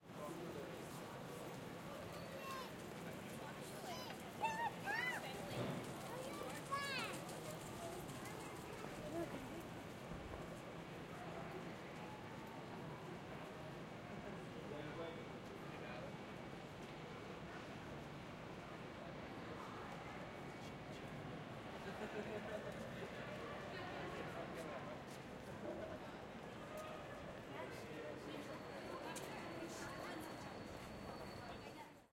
Crowd Noise Light 2
A selection of ambiences taken from Glasgow City centre throughout the day on a holiday weekend,